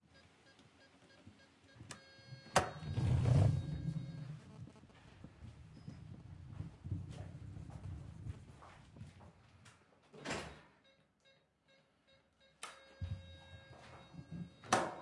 Electronic door opener
door, opener, Electronic